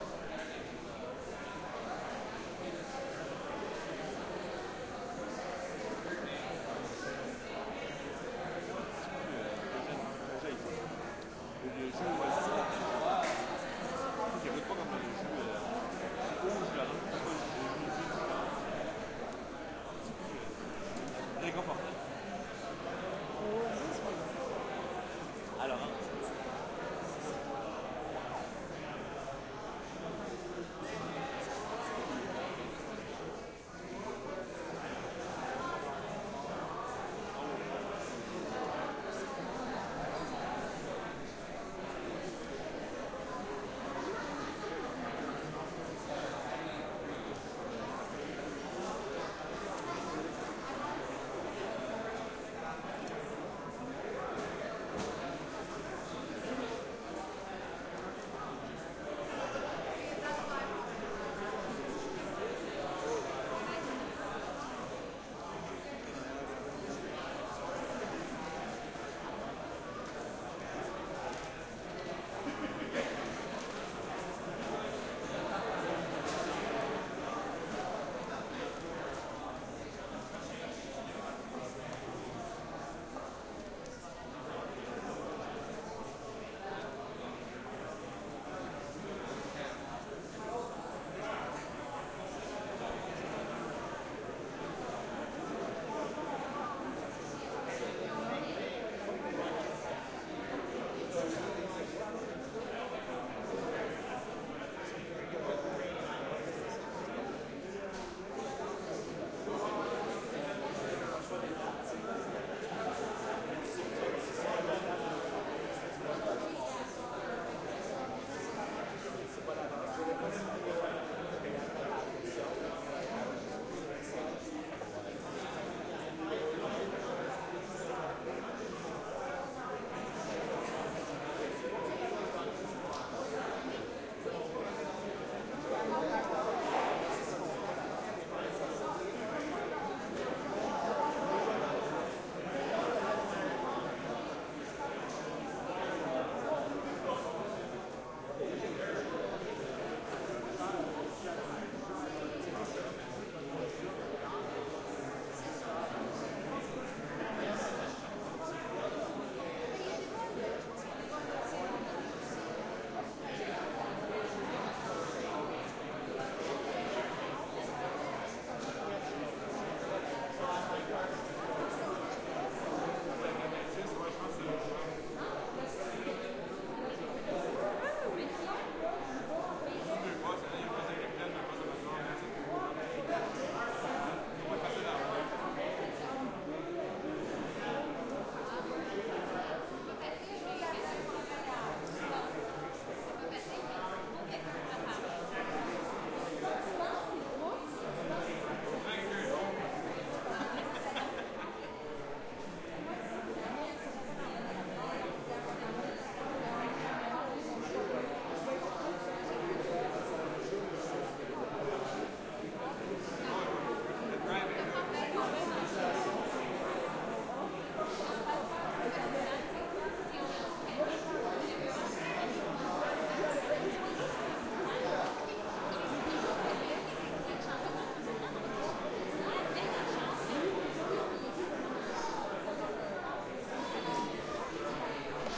Busy airport lobby, French and English, Canada.